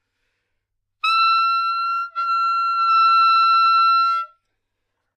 Part of the Good-sounds dataset of monophonic instrumental sounds.
instrument::sax_soprano
note::E
octave::6
midi note::76
good-sounds-id::5769
Intentionally played as an example of bad-timbre